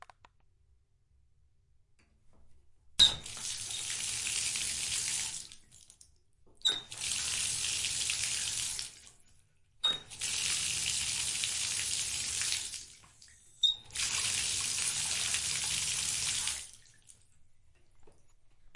Squeaky Tap and flowing water
Bath tap and pouring water in short bursts and recorded with Roland R-05
bathroom, bath-tap, flow, squeaky, tap, water